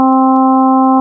generated C note
note, tone, c